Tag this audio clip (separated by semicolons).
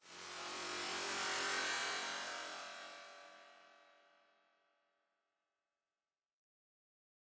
effect; sound-effect; sound; fx